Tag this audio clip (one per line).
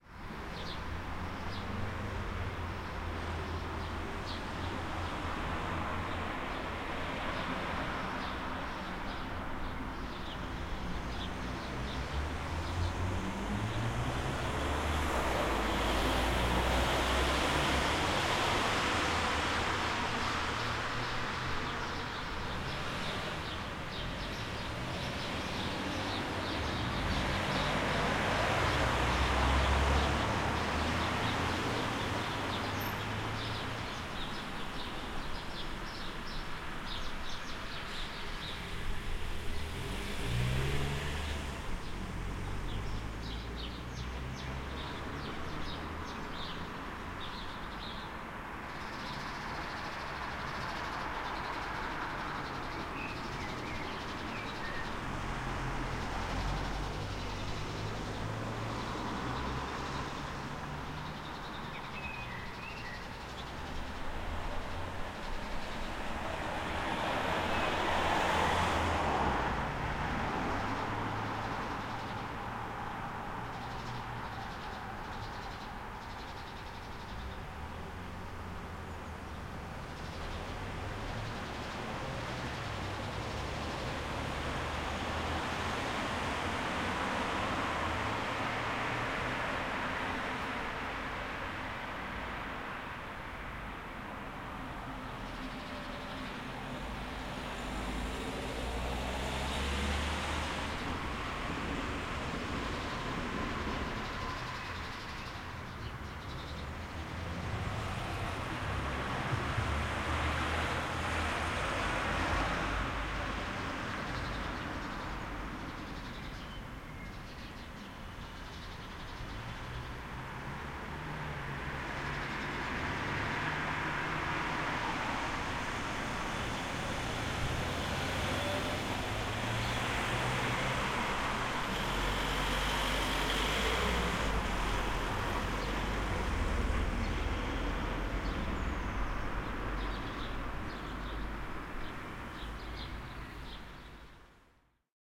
Birds
Cars
mittelgrosse
motor
Strasse